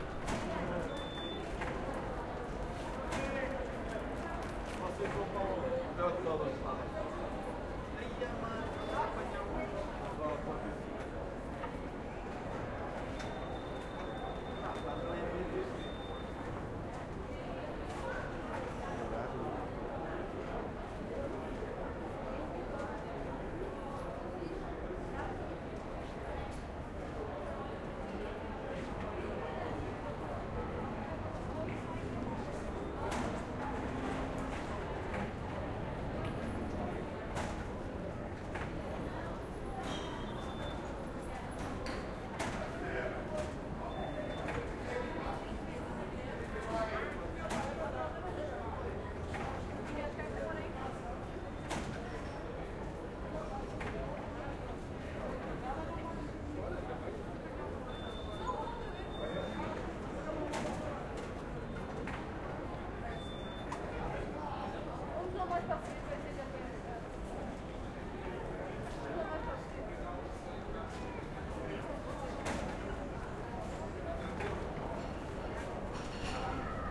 Ambience EXT day train station exit busy doors cais sodre (lisbon portugal)
Field Recording done with my Zoom H4n with its internal mics.
Created in 2017.
doors, station, day, Ambience, train, EXT, cais, busy, portugal, lisbon, sodre, exit